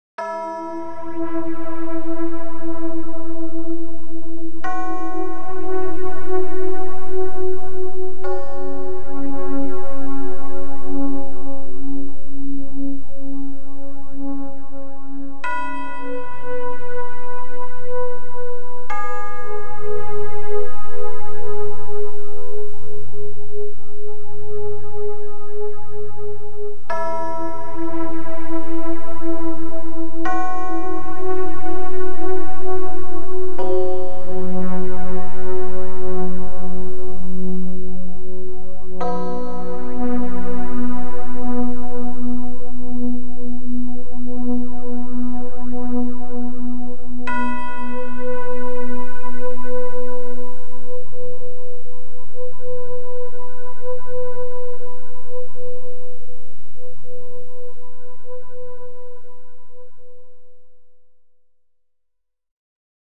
late bells
Hopefully these bells will protect us during the night.
Made with Grain Science app, edited with WavePad.